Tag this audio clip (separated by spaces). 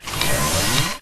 pick-up sci-fi sfx game weapon